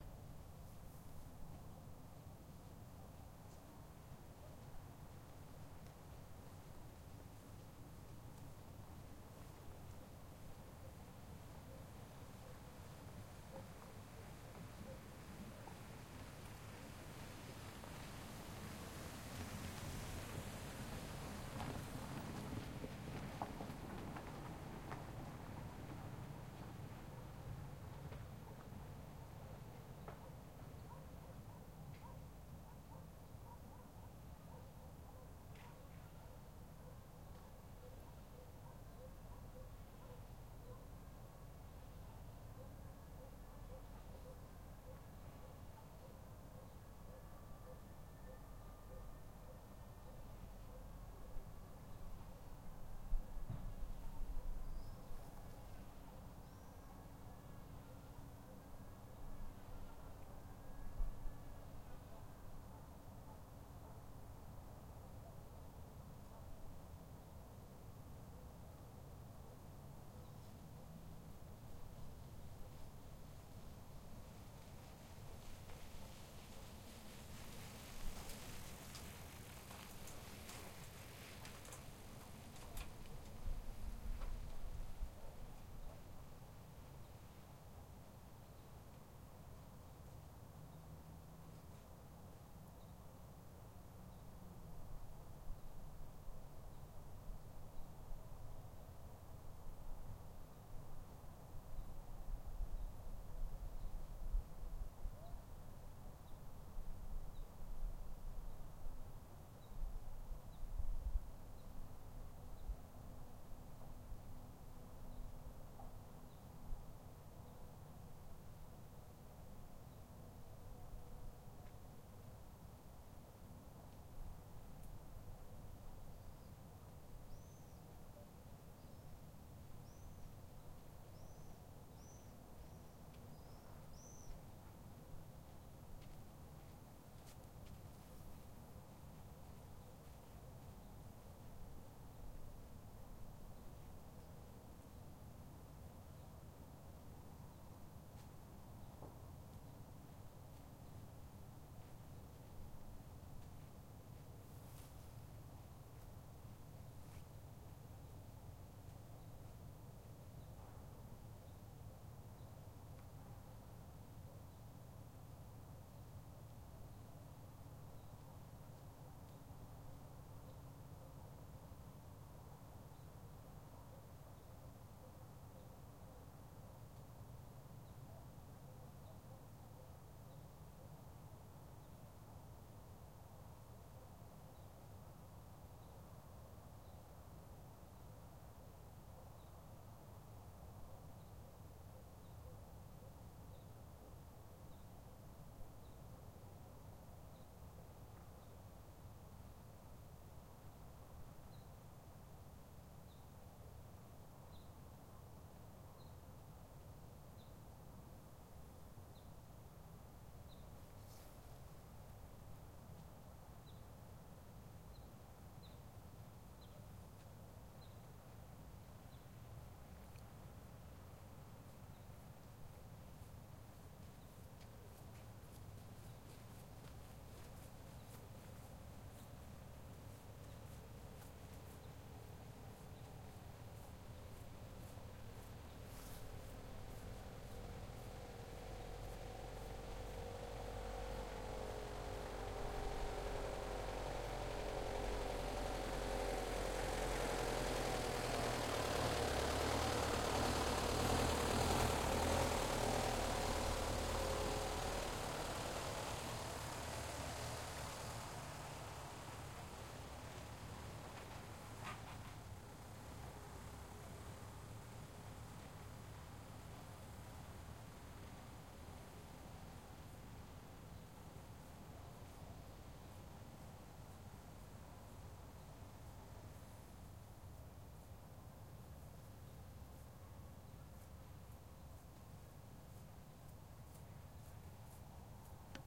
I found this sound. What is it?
Quiet ambience far from city (1)
This sound is recorded far from Yaroslavl city, on the other coast of Volga river. Nothing happens, evening atmosphere, little bit birds, some cars driving slowly. Distant dogs.
raw, russia, atmosphere, background-sound, ambience, soundscape, suburban